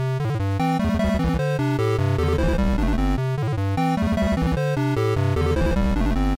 This feels like a minigame in Mario, or something. I created it using Beepbox.